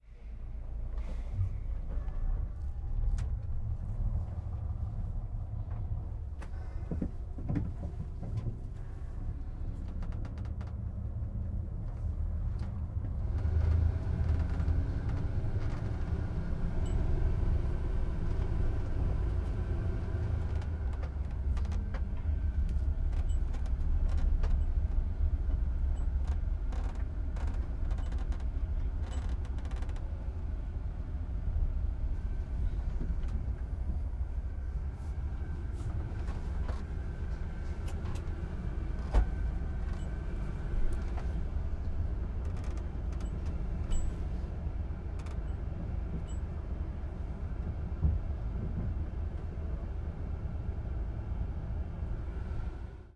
Tourist Bus Internal 01
Tourist, Interna